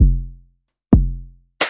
Rhythmmaker Randomized 140 bpm loop -72
An experimental minimal electronic drumloop. This loop is part of the "Rhythmmaker Randomized 140 bpm
loops pack" sample pack. They were all created with the Rhythmmaker
ensemble, part of the Electronic Instruments Vol. 1, within Reaktor. Tempo is 140 bpm
and duration 1 bar in 4/4. The measure division is sometimes different
from the the straight four on the floor and quite experimental.
Exported as a loop within Cubase SX and mastering done within Wavelab using several plugins (EQ, Stereo Enhancer, multiband compressor, limiter).
140-bpm, drumloop, electro, loop